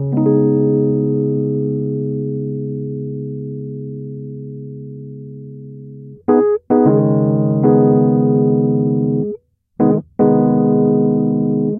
rhodes commune
Several chords and bass notes played on a 1977 Rhodes MK1 recorded direct into Focusrite interface. Just begging for a wahwah guitar and technicolor mustaches. Loopable at ~74BPM